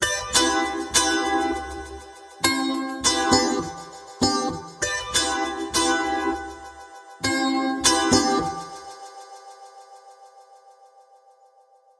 Hip Hop3 100 BPM
club, move, sample, broadcast, music, background, interlude